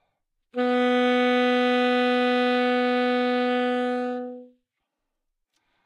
Sax Alto - B3
Part of the Good-sounds dataset of monophonic instrumental sounds.
instrument::sax_alto
note::B
octave::3
midi note::47
good-sounds-id::4651
alto, good-sounds, neumann-U87, B3, multisample, sax, single-note